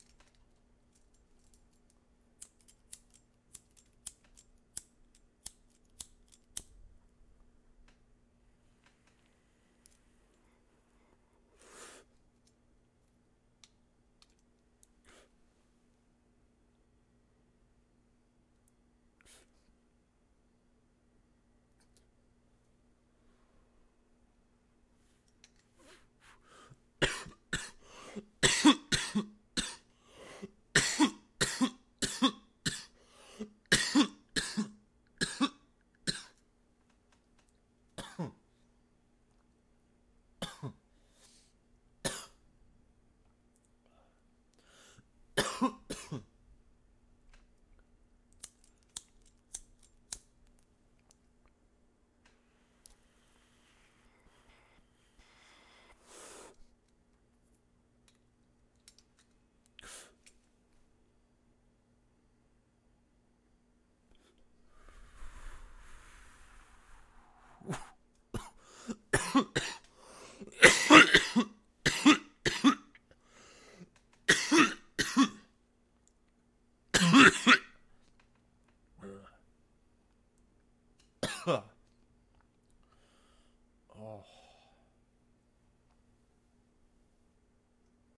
Sounds of a lighter flicking into action; burning leaves; inhaling smoke through pipe; exhaling smoke; followed by a prolonged, violent coughing fit; and a final sigh of relief.
lighter, pipe, smoking, coughing